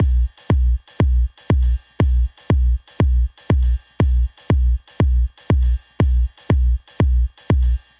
SINCOPA MEDIA
ritmo realizado en reason
claves, drum, reason, sincopa